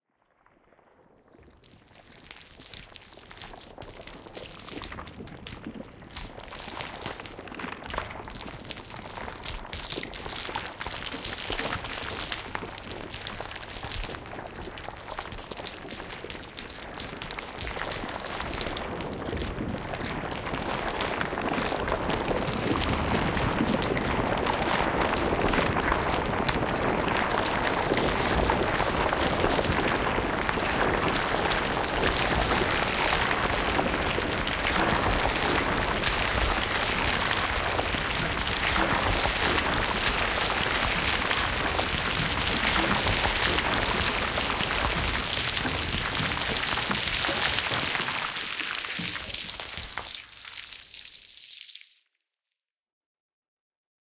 cauliflower compilation3a
The cauliflower samples from the vegetable store sample pack were compiled in a one minute arrangement. Some pitch-alterations (mainly lowering randomly per track) were added in busses for the broader sounds. Furthermore a reverb to juicy it up.
debris, dirth, filth, horror, processed, raunched, vegetable, water